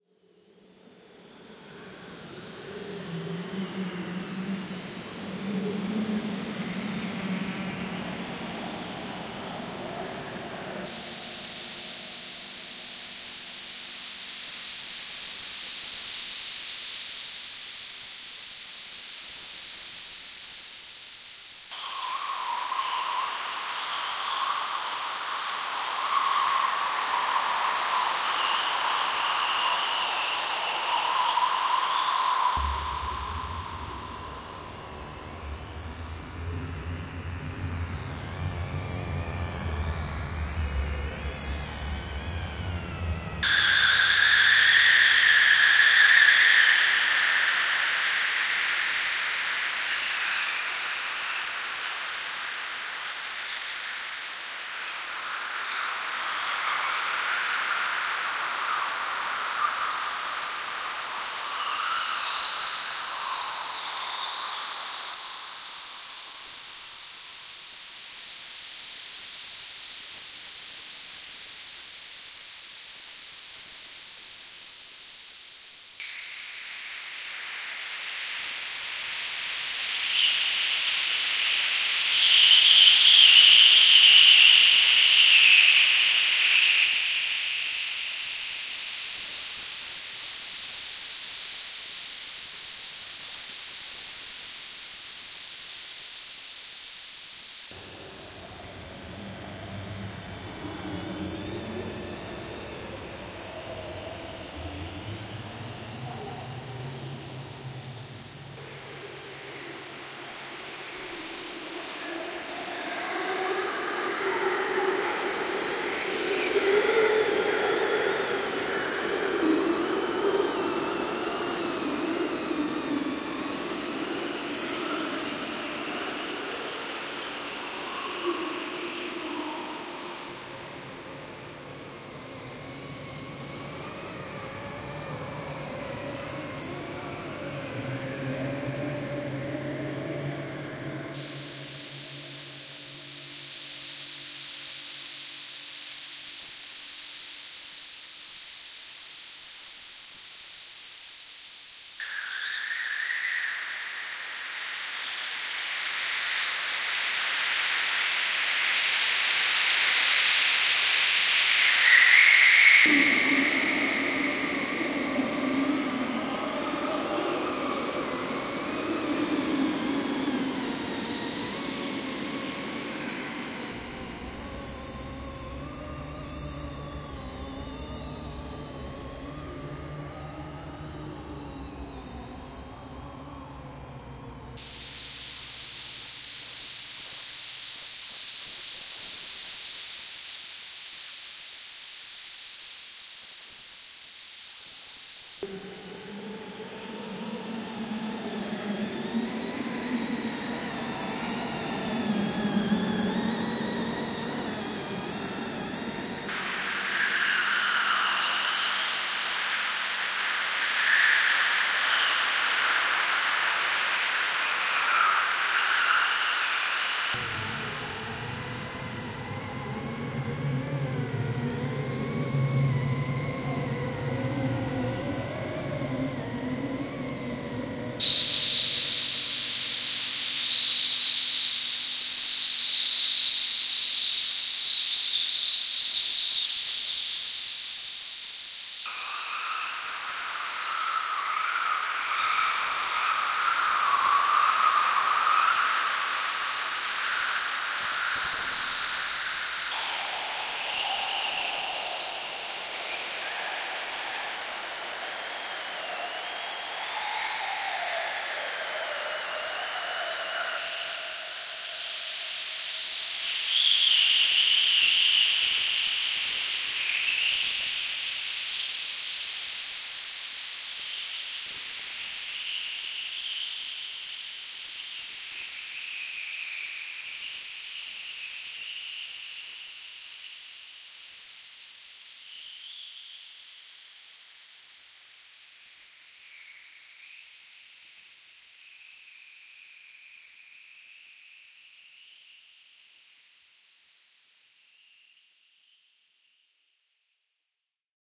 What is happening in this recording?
Space Drone 16
This sample is part of the "Space Drone 2" sample pack. 5 minutes of pure ambient space drone. A broad spectrum drone with a dark feel.